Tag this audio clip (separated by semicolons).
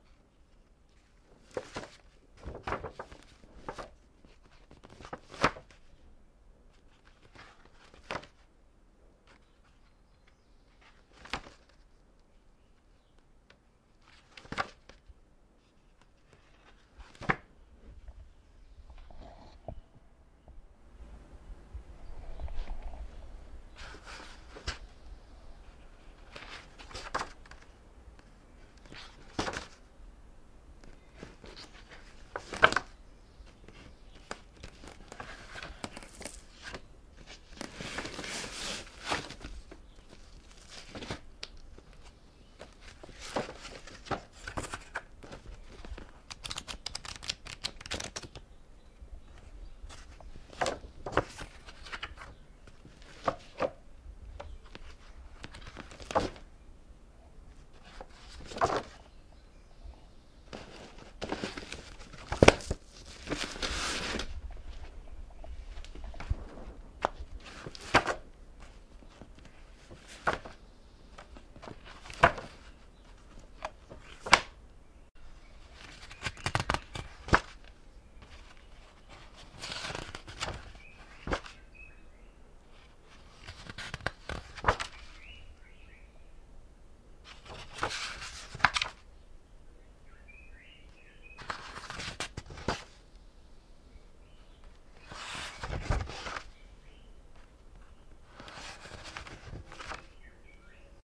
books; turning-pages-over; turning-pages-sound